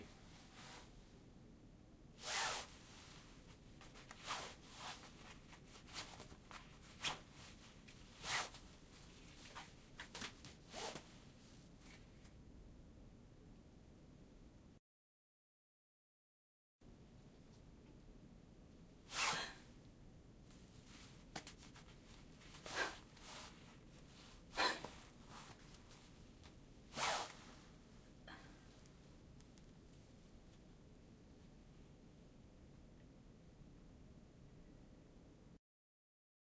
Tightening Bone Corset
Tightening a bone corset. First without breath, then with a gasping sound.
clothing, tie, bone-corset, tying, corset, tightening